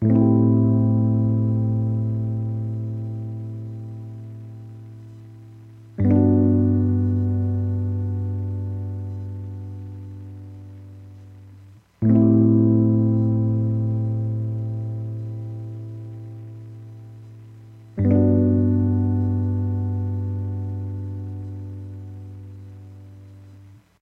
Lofi Downtempo Keyboard / Rhodes Loop Created with Korg M3
80 BPM
Key of C Major
Portland, Oregon
May 2020
80BPM, chill, dark, digital, downtempo, keyboard, korg, lofi